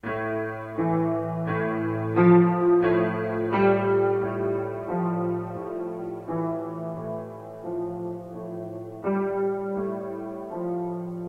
Lola in the Forest
Slow piano melody.
slow, quiet